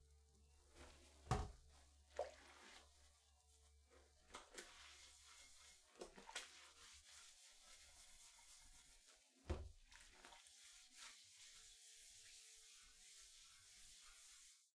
WATRMvmt washing a plate TAS H6
Recorded with a Zoom H6 and Stereo capsule. Sound of a plate being washed by hand and dipped in water.
scraping, splashing, water, owi, kitchen, washing, plate